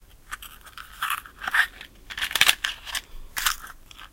a box of matches
burning, flames, matches